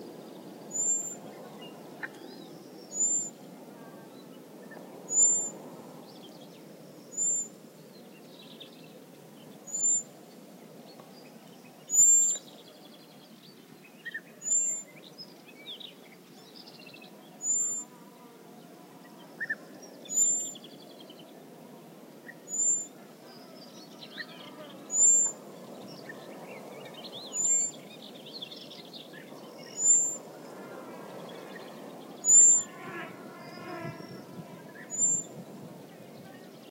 high pitched (7 Khz) call of a bird species unknown to me. Recorded inside the scrub that surrounds a temporary pond in Doñana National Park. Bee-eaters, flies, and wind on shrubs in BG, / sonido agudisimo de una especie de pájaro que no conozco, grabado dentro del matorral que rodea a una laguna temporal, Doñana